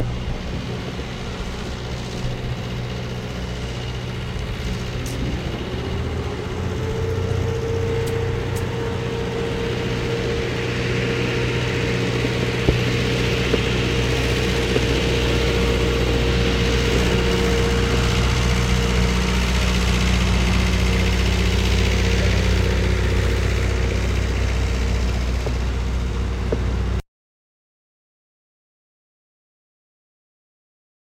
Ambient noise of construction site machines and trucks